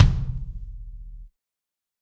Kick Of God Wet 010
kick, set, kit, realistic, god, pack, drumset, drum